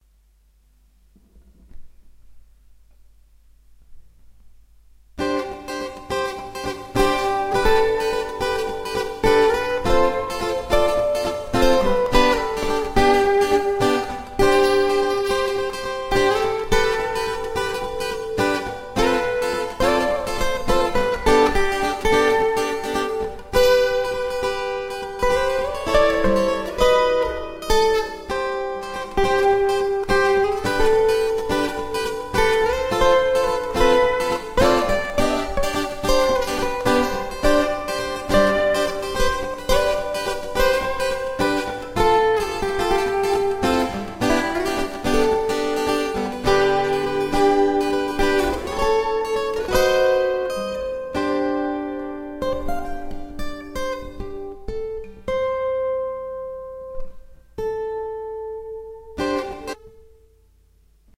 Positive tune - two guitars
Positive guitar tune - two guitars.
acoustic, atmospheric, experimental, guitar, music, tune